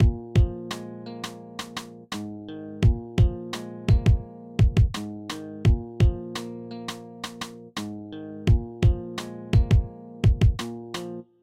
Guitar beat by decent.
beat, decent, drum, guitar, kick, music, rap